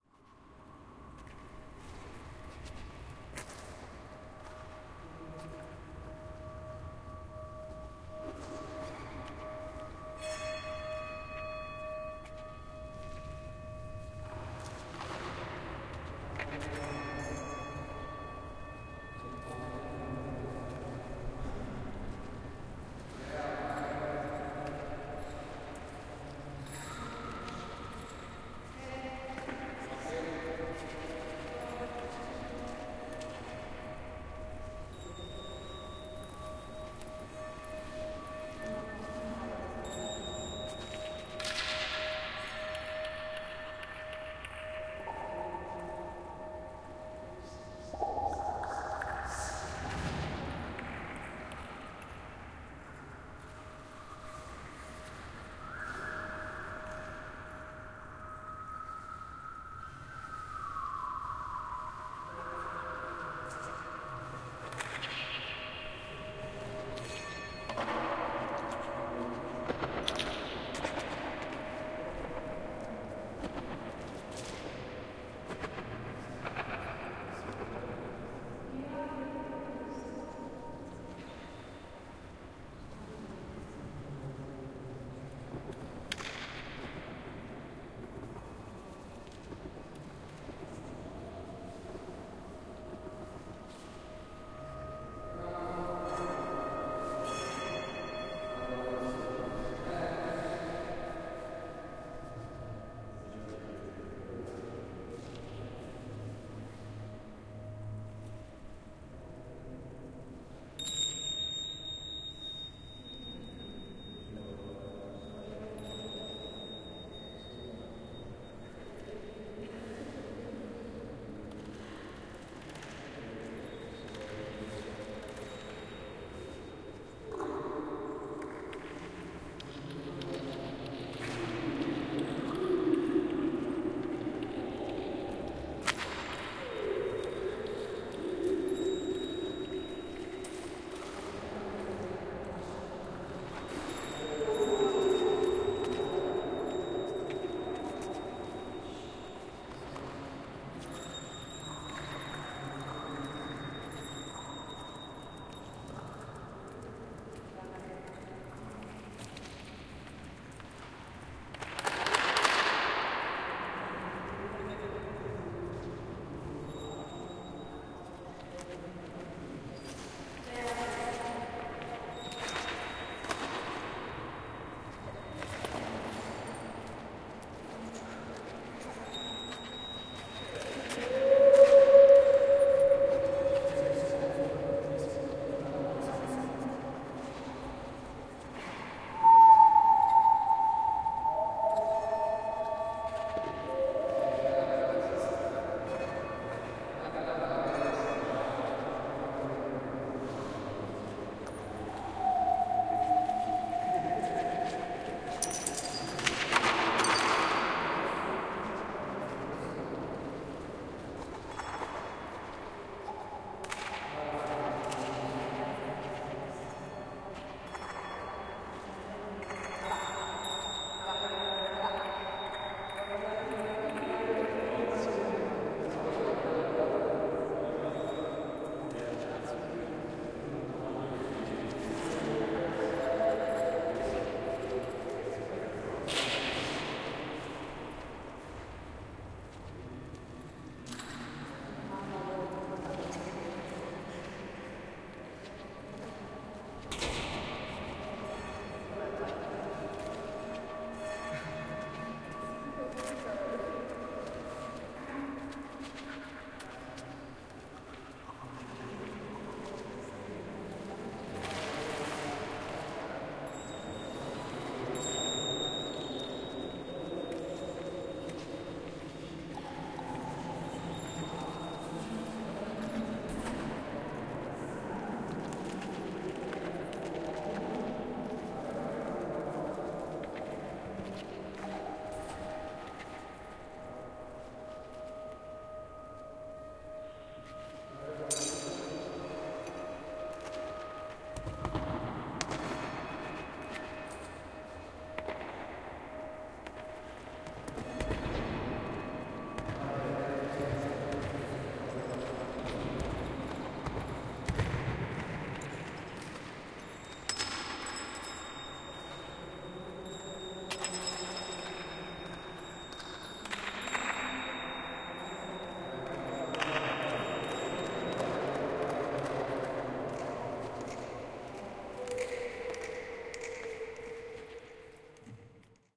echos in a dome
On a hill in Berlin there is a abandoned radar-station of the us-army. on the top of the tower there is a dome with a very special acoustic. you can hear the people playing with this spatial situation.